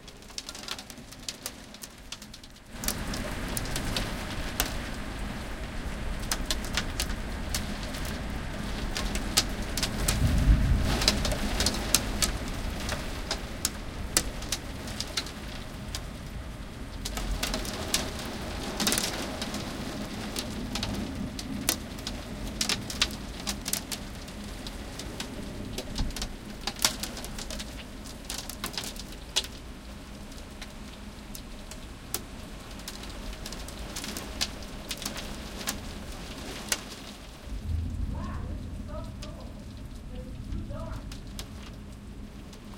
rain onWindow
rain and small hail on the window of our apartment (NYC, 14th flr.). Some thunder.